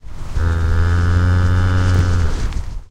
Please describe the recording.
A cow says mooh, that's all really. Recorded with a TSM PR1 portable digital recorder, with external stereo microphones. Edited in Audacity.
cow, fieldrecording, moo, mooh, muh, ringtone